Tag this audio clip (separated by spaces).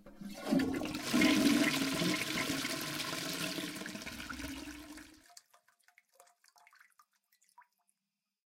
toilet-flush water